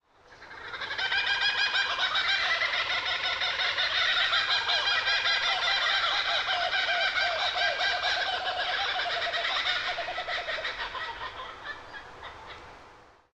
kookaburras in the evening, sun setting.
wildlife, kookaburra, australia, birds